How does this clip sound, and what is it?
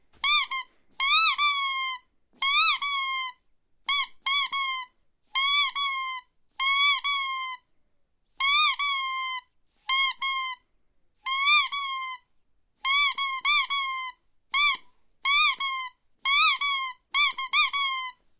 ruber duck big 1
squeek, duck, rubber